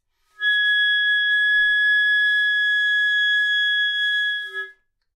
Part of the Good-sounds dataset of monophonic instrumental sounds.
instrument::clarinet
note::Gsharp
octave::6
midi note::80
good-sounds-id::2327
single-note, clarinet, multisample, Gsharp6, good-sounds, neumann-U87